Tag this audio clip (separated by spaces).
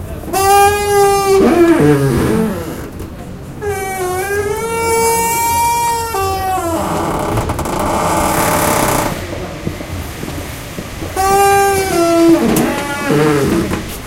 sonic-snap Escola-Basica-Gualtar